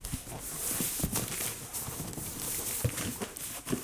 Cardboard Box Rustle 5
A friend moving his hands around a cardboard box. The box had tape on it, hence the slight rustle.
box, Cardboard, crumple, crunch, package, rustle, rustling, tape